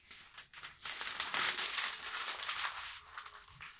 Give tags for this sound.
Cares; Foley; Nobody; Random

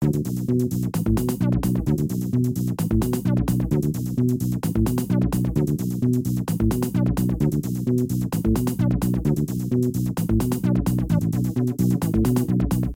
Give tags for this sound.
download-music; loops; sbt

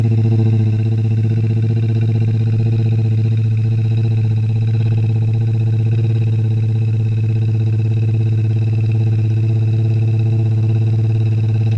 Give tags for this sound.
revving; engine; car; motor

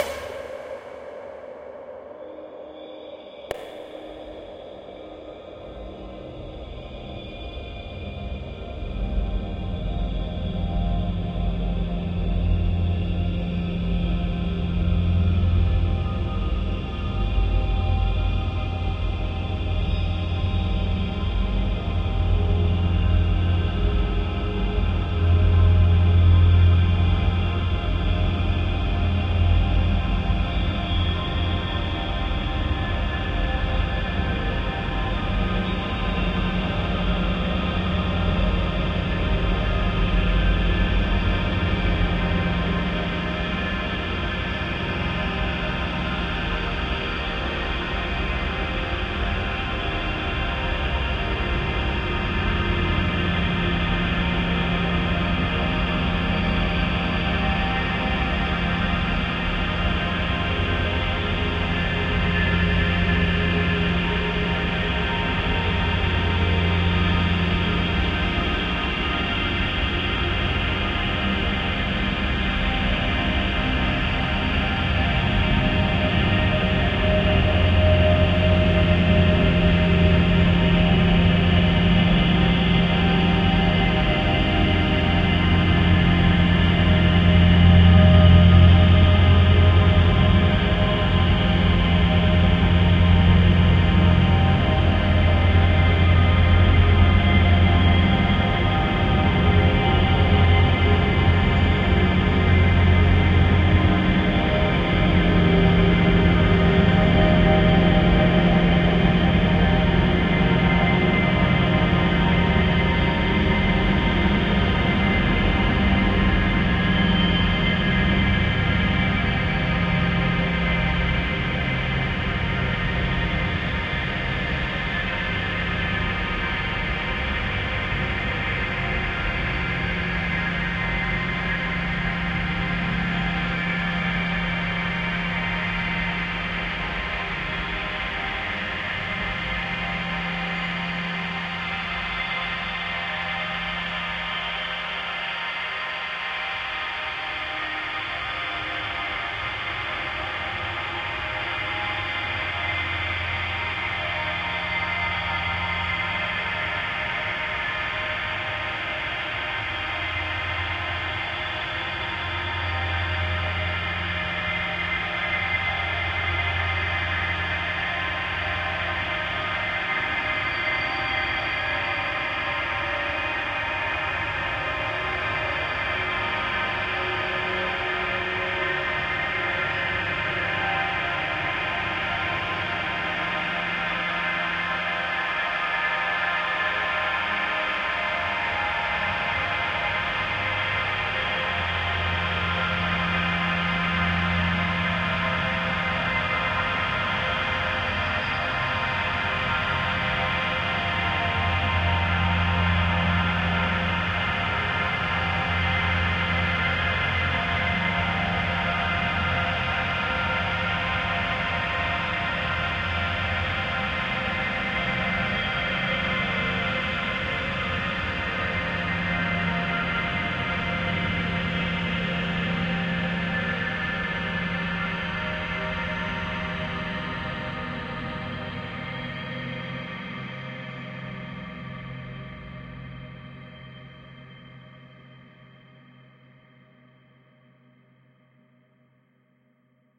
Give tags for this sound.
dreamy; evolving; ambient; multisample; soundscape; smooth; artificial; divine; pad; drone

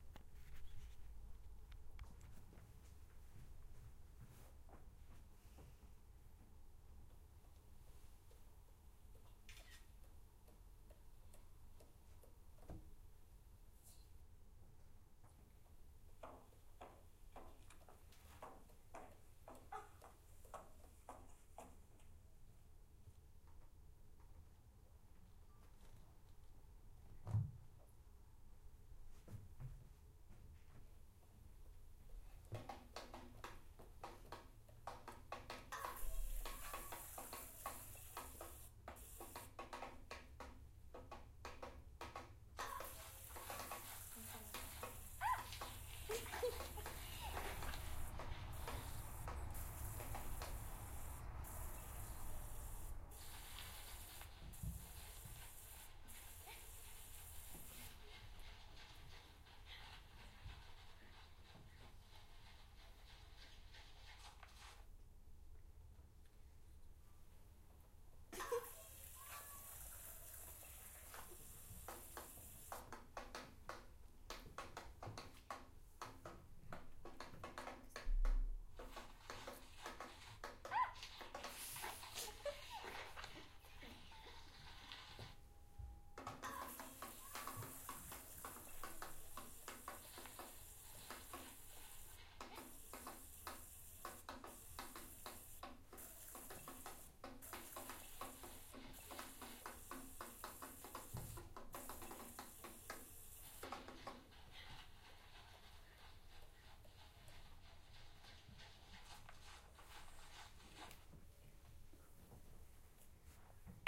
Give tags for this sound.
Switzerland; sources; sound; school

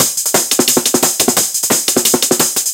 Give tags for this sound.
hat; kik; 2; drum; bar; snare; hi; loud; bass; big; loop

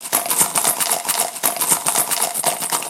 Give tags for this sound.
Shaking; Money; Coins

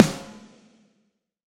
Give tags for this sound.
real; drum

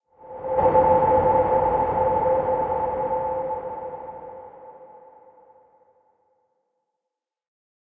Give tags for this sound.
woosh; sound; sfx; swoosh; swish; Transition

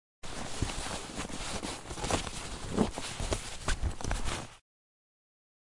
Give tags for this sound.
laptop; laptop-bag; bag; pack; luggage; suitcase; foley

